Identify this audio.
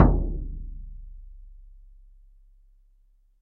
Shaman Hand Frame Drum 08
Shaman Hand Frame Drum
Studio Recording
Rode NT1000
AKG C1000s
Clock Audio C 009E-RF Boundary Microphone
Reaper DAW